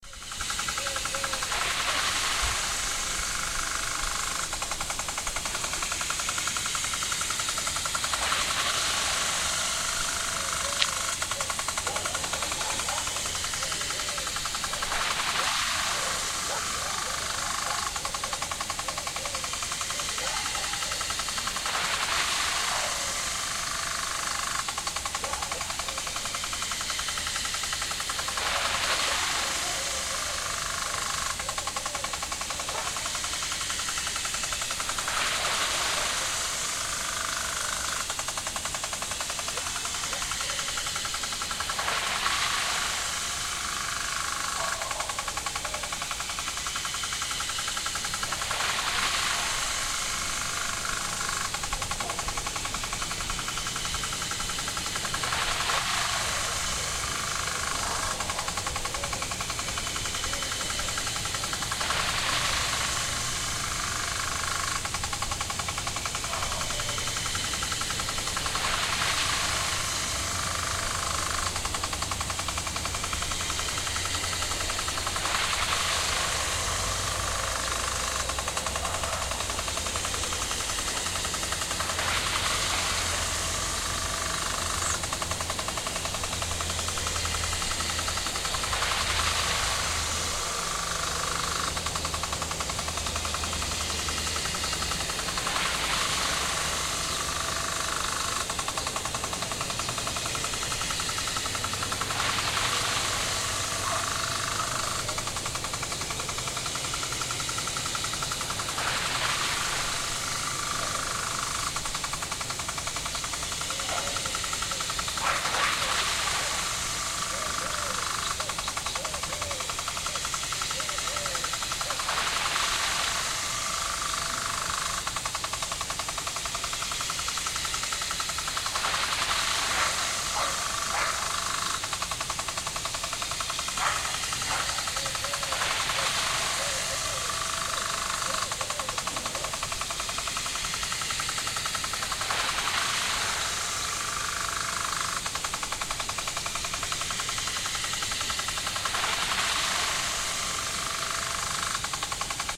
We awaked to next door douse with a round sprinkler at peep of day. ( grassland + one shrub)
douse, garden